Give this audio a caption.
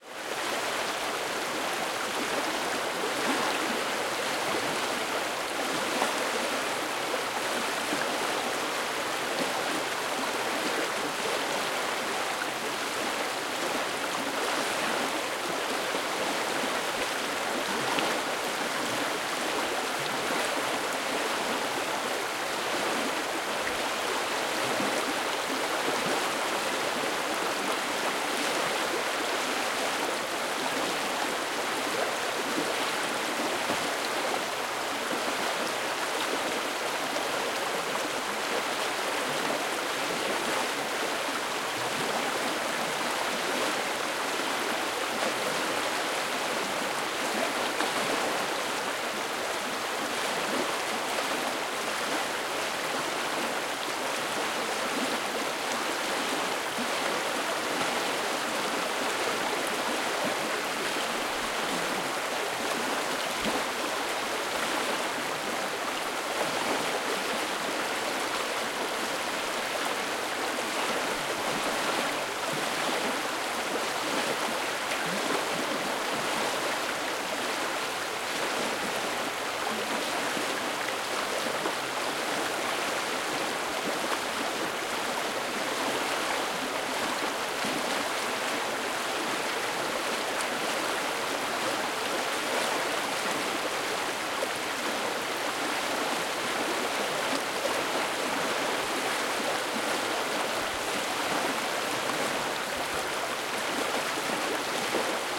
River rapid Camp Blommaberg 3
Recording of a small rapid in the river Voxnan in Sweden.
Equipment used: Zoom H4, internal mice.
Date: 14/08/2015
Location: Camp Blommaberg, Loan, Sweden
Rapid, Water